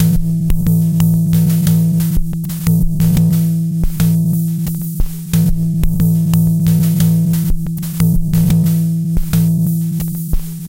lo-fi idm 3
beat distrutti e riassemblati , degradazioni lo-fi - destroyed and reassembled beats, lo-fi degradations
clavia, dubstep, g2, hop, idm, minimal, modular, nord, trip